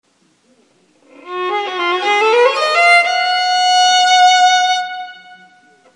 Baroque Phrases on Violin. Playing a familiar Ornamentation Phrase that you might recognize.